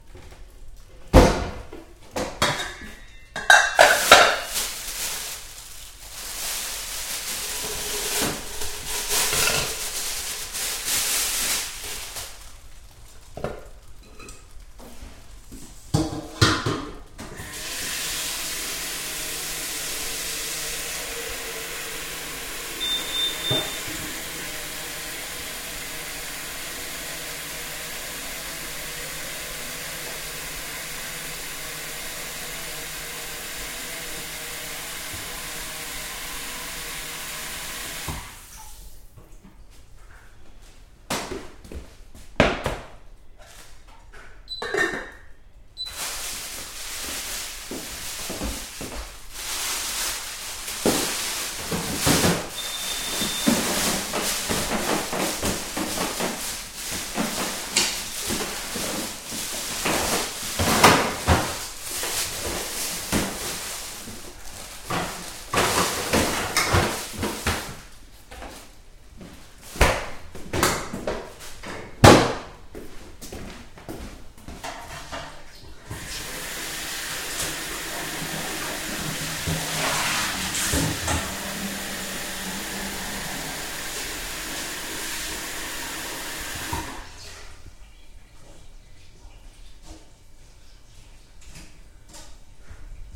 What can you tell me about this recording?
Cooking in the Kitchen.